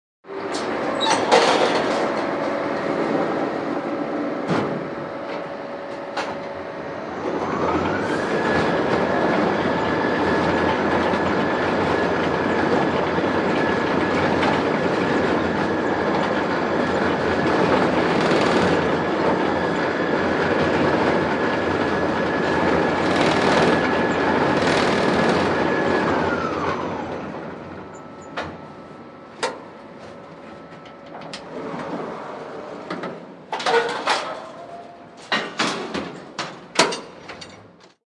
Industrial lift ride-along
Recorded on Marantz PMD661 with Rode NTG-2.
The sound of an industrial lift on a building site. Door clangs shut, followed by interior sound of lift moving followed by door clanging open.
construction, machine, door, chains, site, field-recording, lift, building, mechanical, industrial, clang, metal